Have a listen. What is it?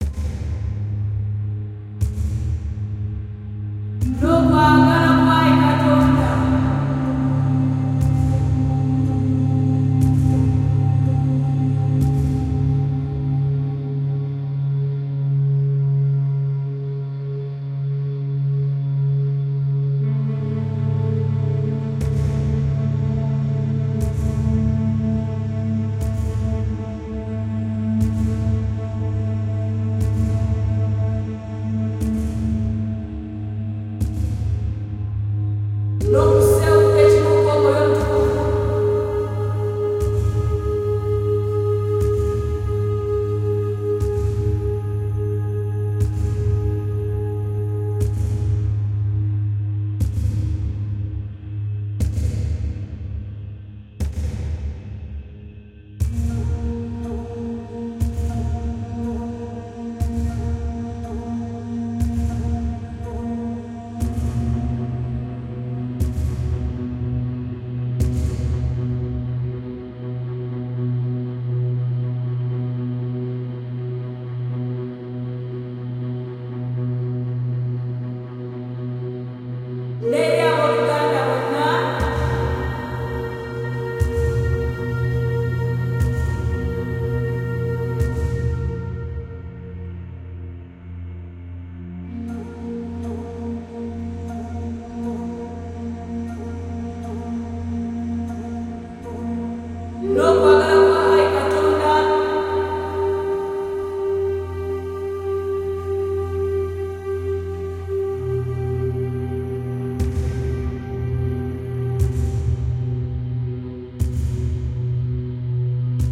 Ethno Folk Vocal Africa Arfican Afro Woman Chill Relx Atmo Soundscape Cinematic Surround
Africa, Afro, Ambient, Arfican, Atmo, Atmosphere, Chill, Cinematic, Dark, Drone, Ethno, Film, Folk, Movie, Relx, Soundscape, Surround, Travel, Vocal, Woman